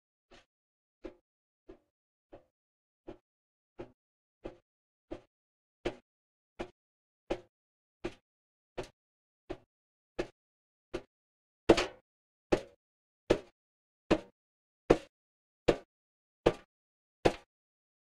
Metal Steps
Me stepping on a metal plate couple of times. Nothing much to say... Enjoy!
footsteps, steps, walking, foot, metal, step, running, footstep, feet, walk